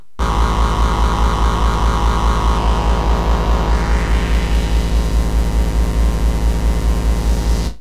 Made with the korg electribe a mkII.
greetings from berlin city!
bassloop, tekno, electro, bass, korg, real, ea1, loop, synth, analog, sequence, trance, synthesizer